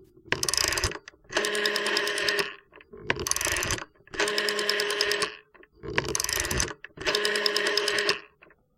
Dialing figure 8 PTT T65 telephone